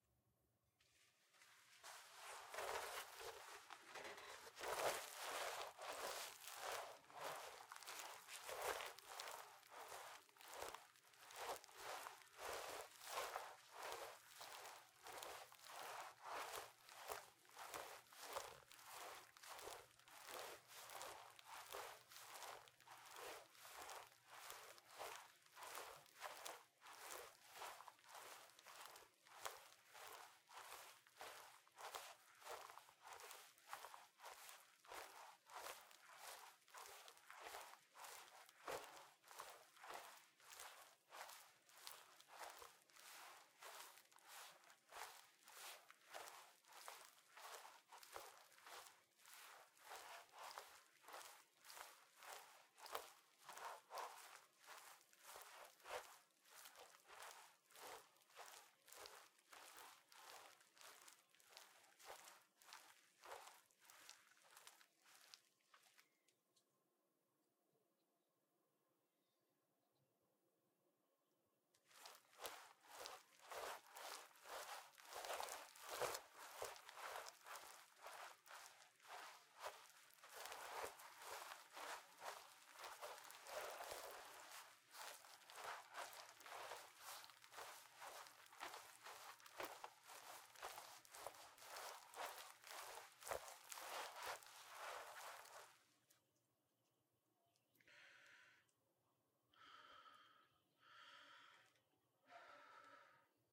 Walking Raincoat Cloth Layer
This is a Foley raincoat layer for matching footsteps.
During the first half of this file, Character walks at medium speed then accelerates slightly and his walking becomes a bit unstable. There's a bit of performed breathing at the end.
Sennheiser 416 into Neve Portico preamp, Reaper.
raincoat, layer, foley, cloth, footsteps, recording